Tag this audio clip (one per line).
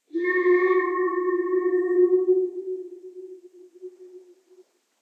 field-recording
steam
stereo
whistle
xy